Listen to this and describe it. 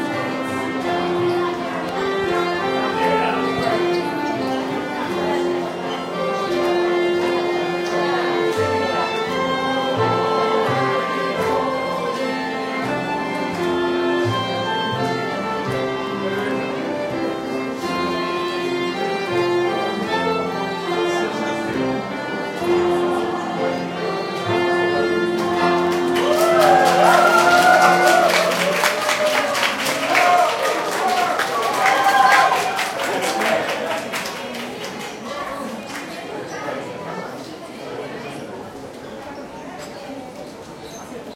120731 Venice AT CafeFlorian F 4824
The orchestra at the Café Florian on the Piazzale San Marco, just finishing some catchy chinese tune for som chinese tourists, who then start vigorously applauding.
These are some recordings I did on a trip to Venice with my Zoom H2, set to 90° dispersion.
They are also available as surround recordings (4ch, with the rear channals at 120° dispersion) Just send me a message if you want them. They're just as free as these stereo versions.